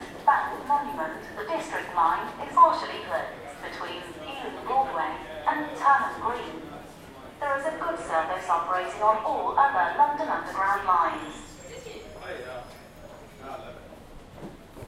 London Underground Announcement in Bank Station
Recording of an announcement in Bank Station- London Underground